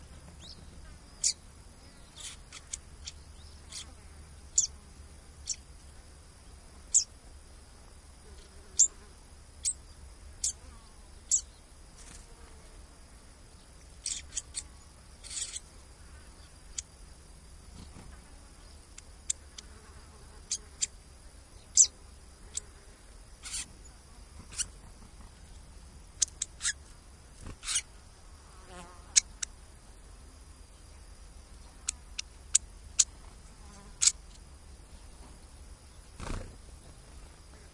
20080702.fantailed.warbler.01
Fan-tailed Warbler (Cisticola juncidis) singing and fluttering near the mics, some insects too. The ones recorded here were a group of ten birds, I noticed they loved a particular place on a fence, so I fixed the mics there and waited for their approach. Recorded in
tall grassland marsh (Doñana, S Spain) using a pair of Shure WL183 mics (with DIY windscreens), FEL preamp, and Edirol R09 recorder.
birds, buitron, fantail-warbler, field-recording, marshes, nature, summer, zit-zit